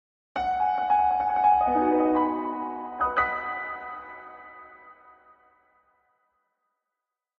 A small classical conclusion.
end, exclamation-mark, finish